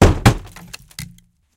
A piece of granite is smashed into an old Chevy nova's bumper. Several pieces break away from the rock and fly in different directions.
break, earth, granite, rock, smash